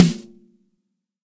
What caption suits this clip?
BDP SNARE 004
Snare drums, both real and sampled, layered, phase-matched and processed in Cool Edit Pro. These BDP snares are an older drum with a nice deep resonance. Recorded with a Beyer M201N through a Millennia Media HV-3D preamp and Symetrix 501 compressor.